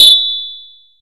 Techno/industrial drum sample, created with psindustrializer (physical modeling drum synth) in 2003.
drum, industrial, metal, percussion, synthetic